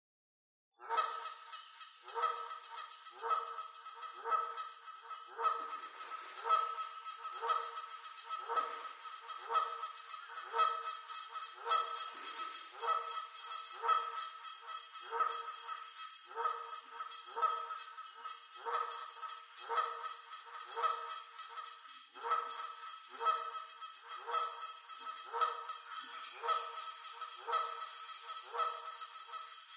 An edit of a goose to make it even more infuriating.